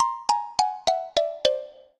Xylophone for cartoon (7)
Edited in Wavelab.
Editado en Wavelab.